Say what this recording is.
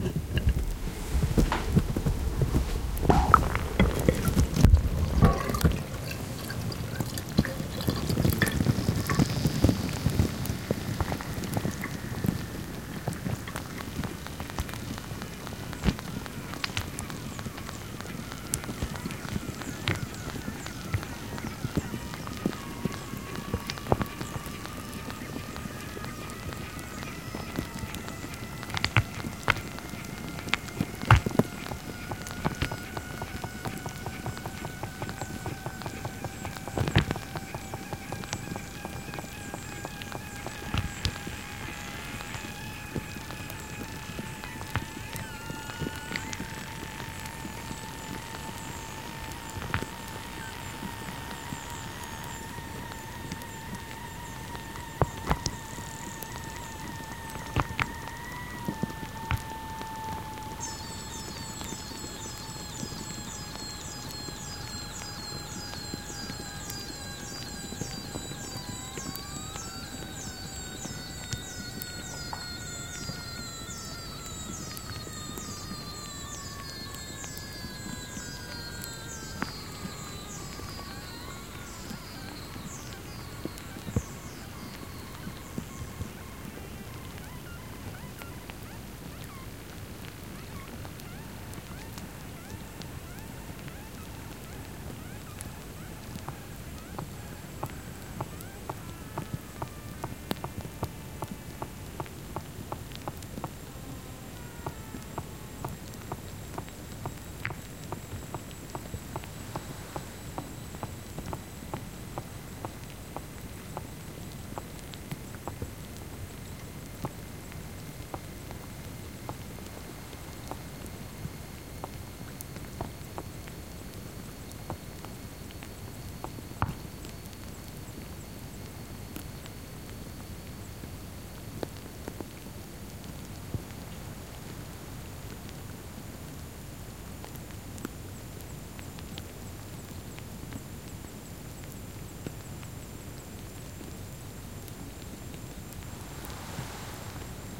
recording of a pottetd plant in a sink.
the pieces of bark etc. in the soil make sounds like
birds, insects or motorbikes while soaking up the water.
recorded using a zoom h2 lying on the soil, no further
processing except normalizing.
crackling, field-recording, nature, soaking, water